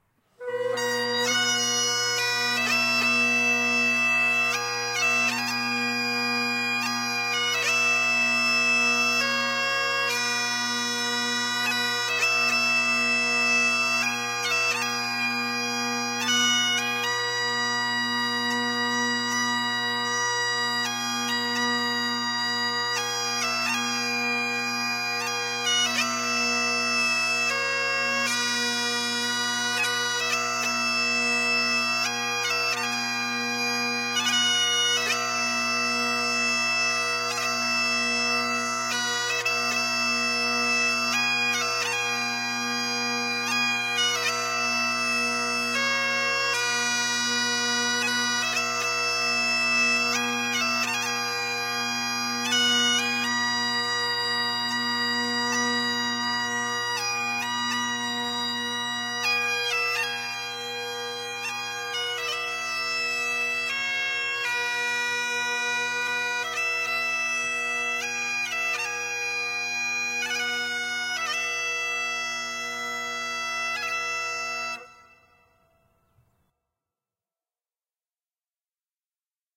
BAGPIPES AMAZING GRACE 1
Mono recording, bagpipes. Recorded with a Shure SM81, Tascam 70d and sweetened in Adobe Audition.
bagpipes, grace